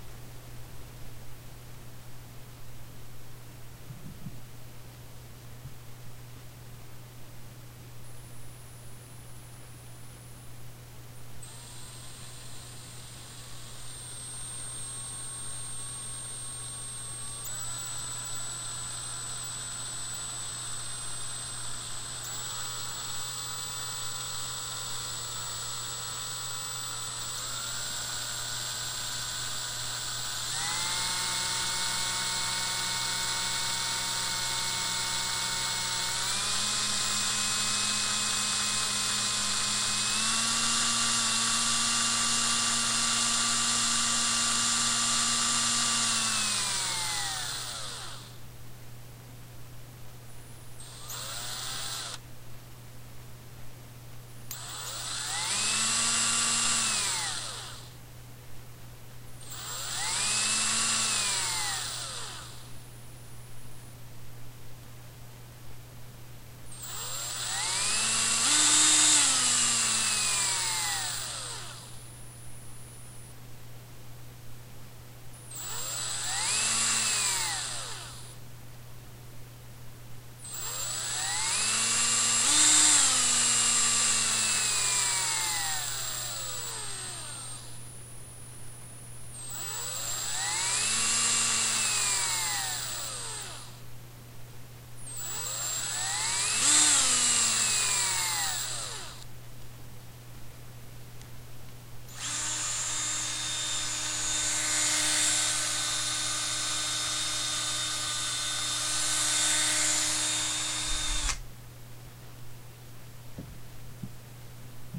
Unprocessed recordings of a drill.